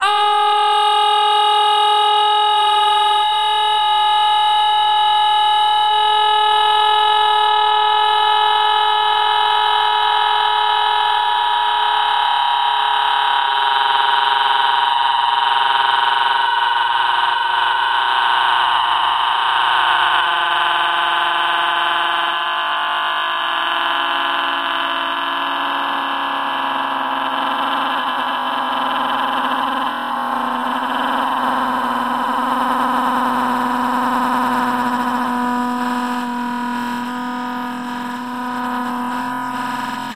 Another sound made from a snippet of a human voice mangled in Cool Edit 96 to simulate a voice exploding through space naked.